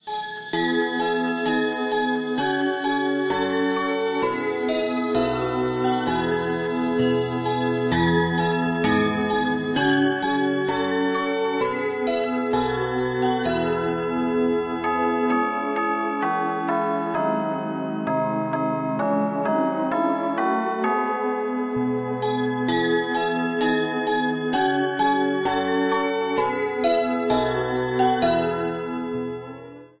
[PREVIEW] O Little Town of Bethlehem (Christmas Special) (featuring Kingsley GalaSpark)
A memory! Yet another cover! It's a preview of an extended cover of a melody I remember hearing when I was younger. We had this book that had those lights and whenever we opened it and/or turned a page, it started playing the song. This is a Christmas special, called "O Little Town of Bethlehem". The final version is gonna feature the vocals, with the lead vocals by one of my characters, Kingsley GalaSpark. And trust me, he has an AMAZING singing voice! Speaking of that, on December 23rd of 2015, when my mom, my grandma, my brother William, and I were at The Ivy Bookshop, I was just minding my own business when suddenly I heard a singing voice coming from the speakers on the ceiling. It was indeed a man's voice, but...ohhh, it's one you'll probably recognize in an opera! I thought it sounded like one of my characters singing! Anyways, though it's not even Christmas, I decided to upload this to remind those of you who remember having that book of the tune it plays!
music-creation, organ, church-bell, ambience, song, memory, remember, Christmas-music, Bethlehem, Xmas, singing, atmosphere, cover, composition, synthesized, light, musical, music, relaxing, carillon, soundscape, synth-lead, impressive, bells